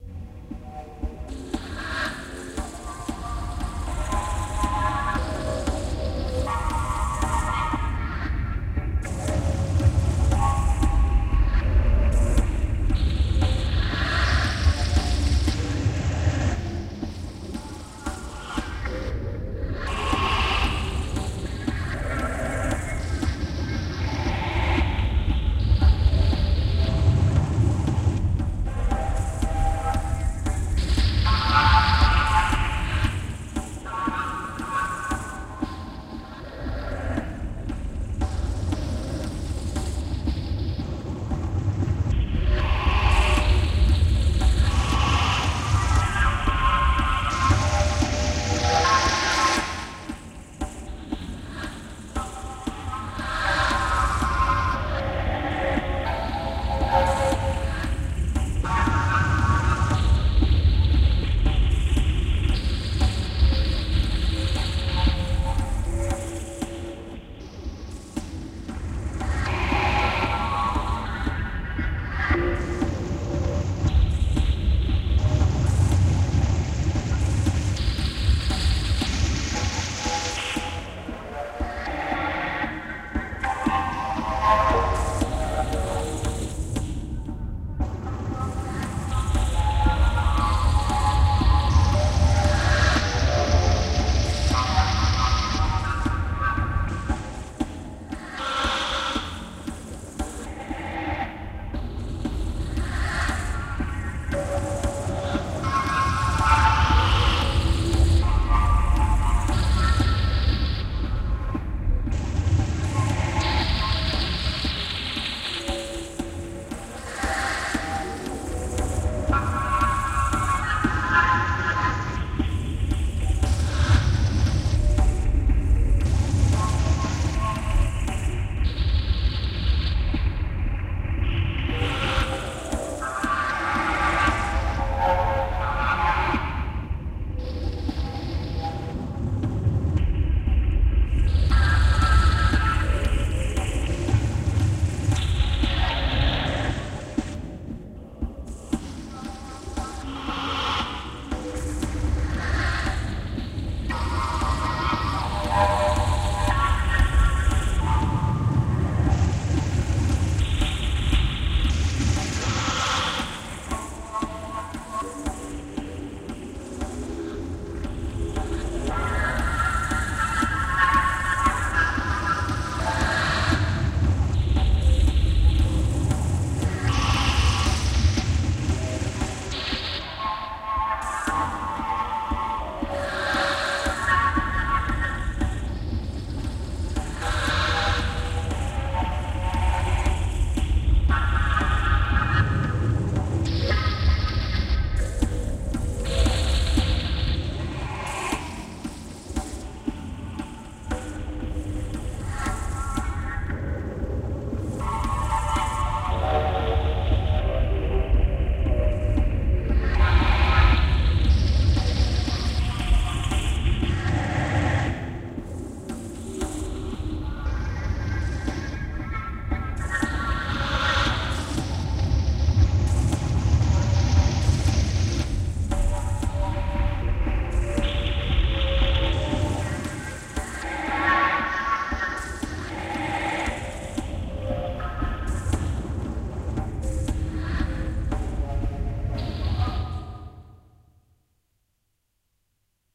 8tr Tape Sounds.